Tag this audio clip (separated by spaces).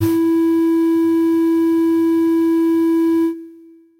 wind panpipes pipes